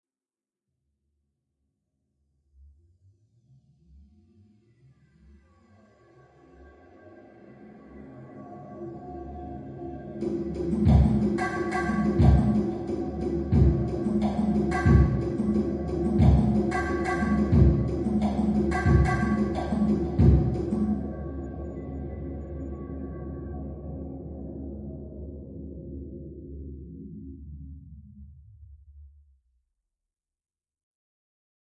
Convolved 52.329 audio
convolution, impulse, reverb, ir